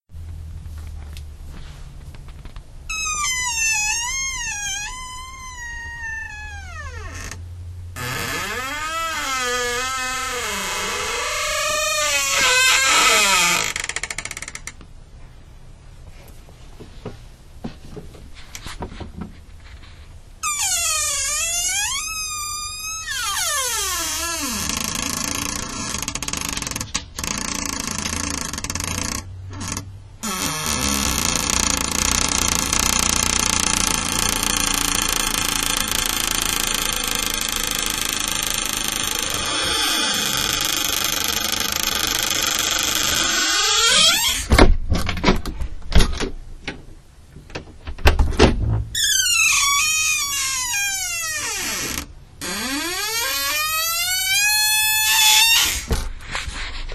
Squeaky Door
My 100 year old pantry door opening and closing, recorded using an Olympus VN-6200PC digital voice recorder. This is an unedited file. It is very squeaky!
door, hinge, squeak, squeaking, squeaky-door